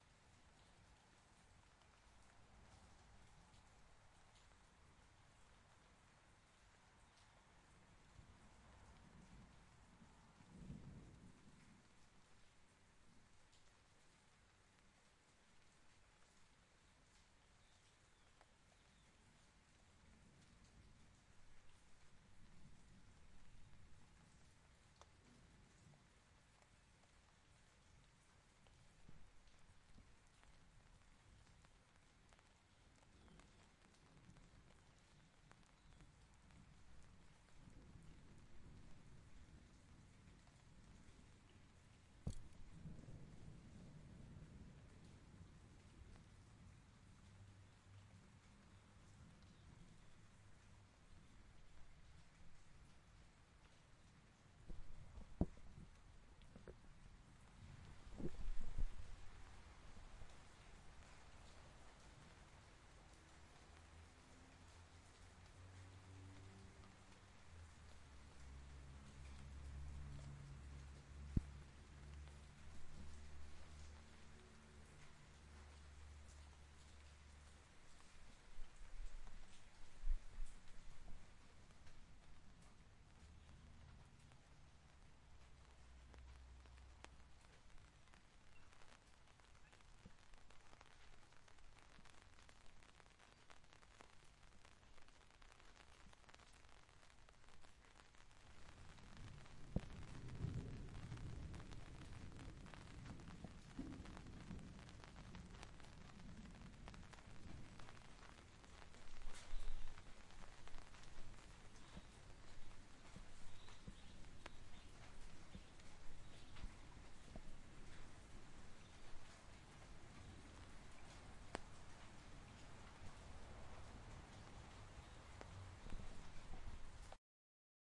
Rainy mid afternoon in a garden (ambience)
A rainy mid afternoon with light thunder in the background. Recorded in small garden in Pretoria South Africa, Recorded with a Zoom H6 portable digital recorder, XY microphone capsule
calm, rain, OWI, thunder, nature, trees, ambience, water, field-recording, outside, rainy-day, garden, afternoon, drizzle